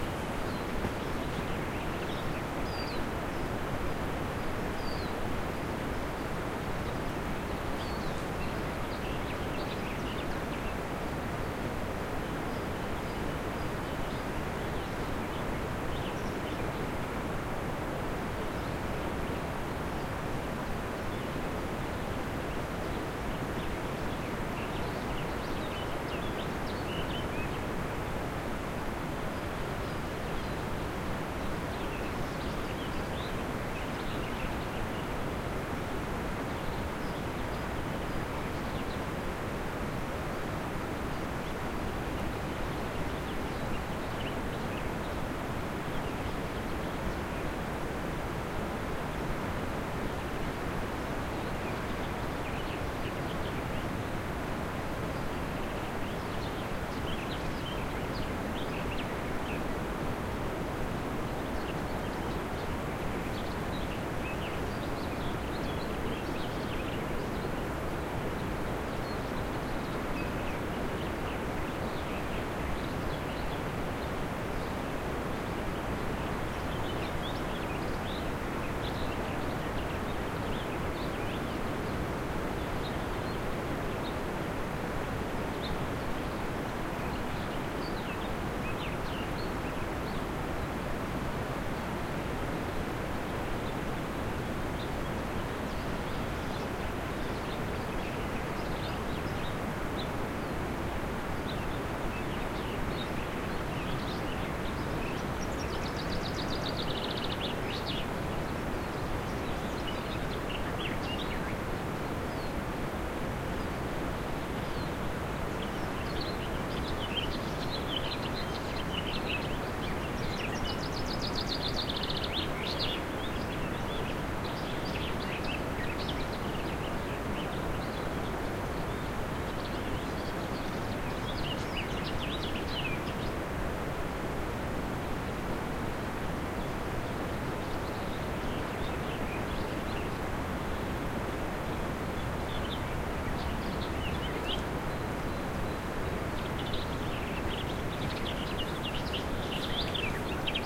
birds by the river int he woods
Birds singing in a summer forrest by the river
ambiance, ambience, ambient, bird, birds, birdsong, field-recording, forest, forrest, nature, river, spring, summer, wildlife, woods